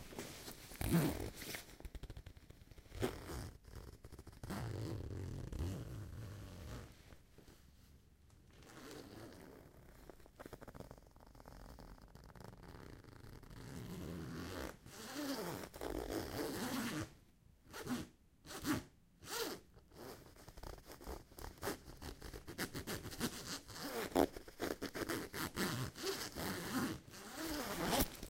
Just a little zipper collection.